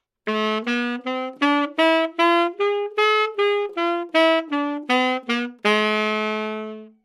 Sax Tenor - A minor - scale-bad-attack-staccato-minor-harmonic
Part of the Good-sounds dataset of monophonic instrumental sounds.
instrument::sax_tenor
note::A
good-sounds-id::6189
mode::harmonic minor
Intentionally played as an example of scale-bad-attack-staccato-minor-harmonic
Aminor, good-sounds, scale, sax